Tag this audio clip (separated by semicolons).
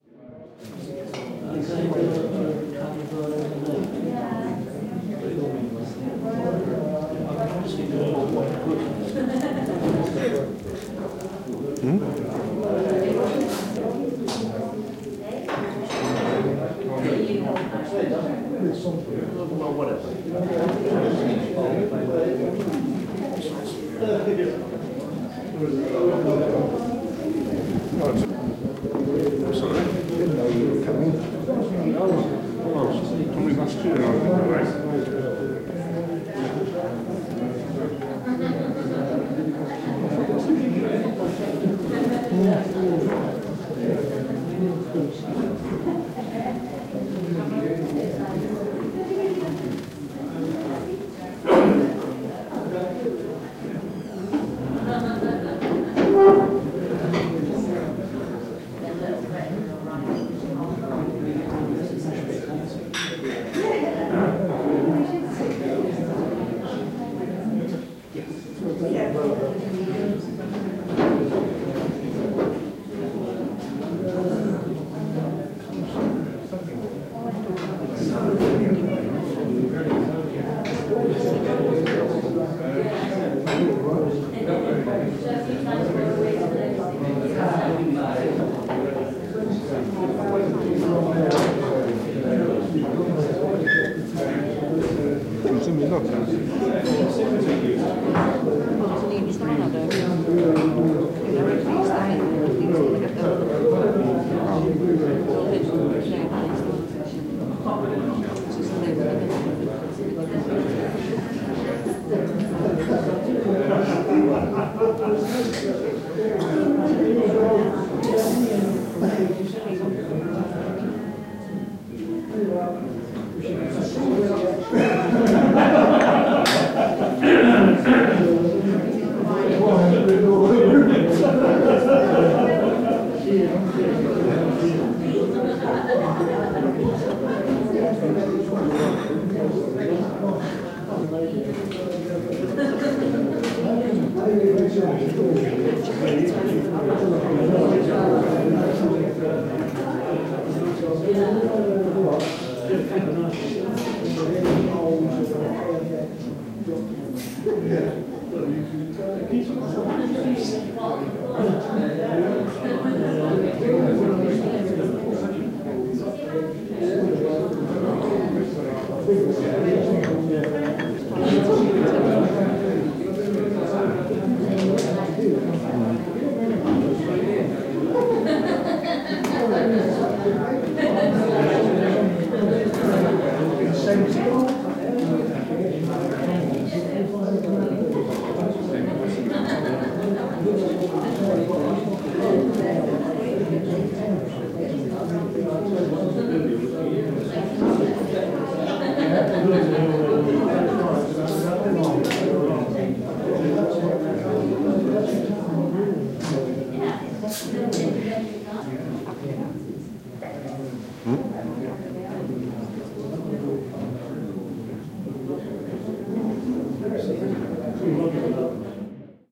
conversation; rhubarb; voices; walla